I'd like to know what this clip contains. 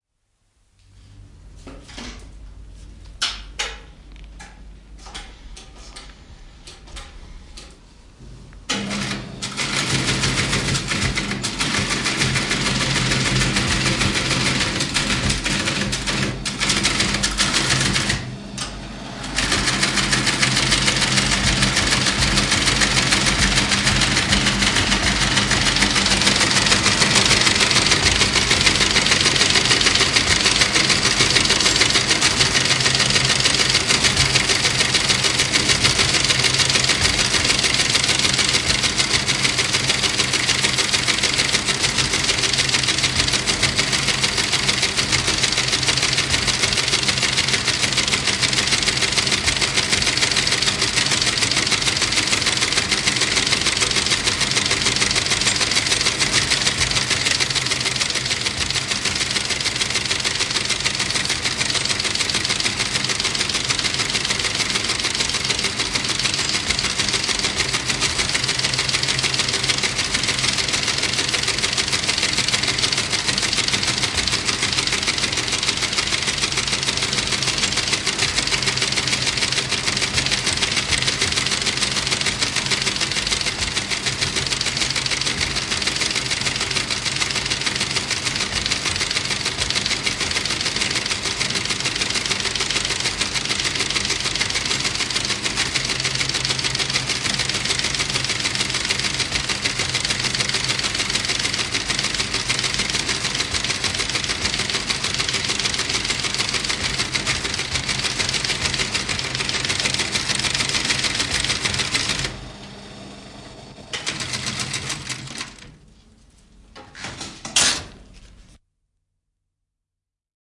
Teleksi, kaukokirjoitin, lähetys / Telex, teleprinter, switch on, sending a message, ticking, paper off
Teleksi kirjoittaa. Laite päälle, rytmikästä raksutusta. Laite sammuu, paperi pois.
Paikka/Place: Suomi / Finland / Turku
Aika/Date: 26.09.1968